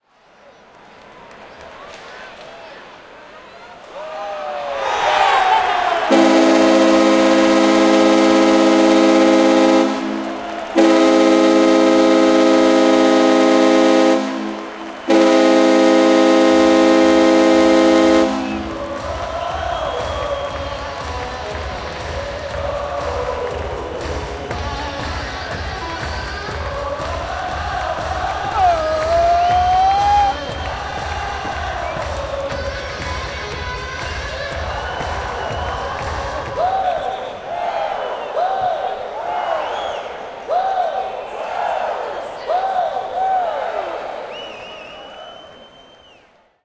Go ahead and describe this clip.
New York Islanders Score Goal, December 2011
Ambiance as the New York Islanders scored a goal at Nassau Coliseum sometime in December 2011. Recorded with a Zoom H4N.
field-recording, goal, hockey, horn, sports